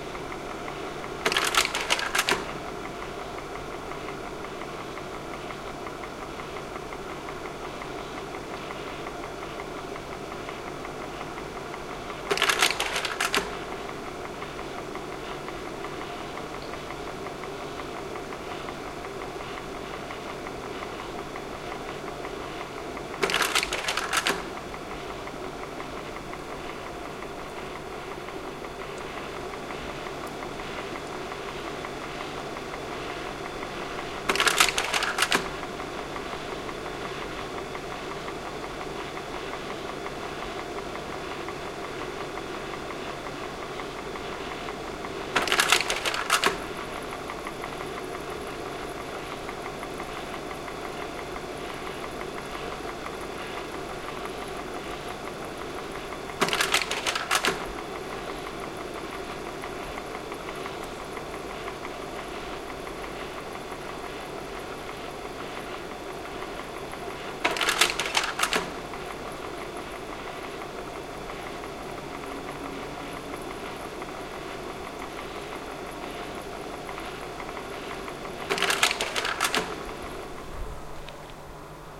20100320.slide.projector
the fan and mechanical noises made by a slide projector, set to change slides at 10s intervals. Recorded at Centro Andaluz de Arte Contemporaneo, Seville (Spain). Olympus LS10 internal mics
photography, slide, field-recording, machine, mechanical, projector, monotony, automatism, art, boring